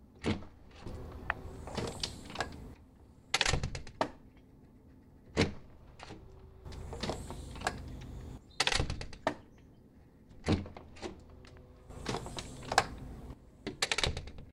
glass-door
The sound features a glass front door of a suburban home is opening and closing. The opening of the door needed more gain – which was added in Pro Tools.
door-close, door-open, glass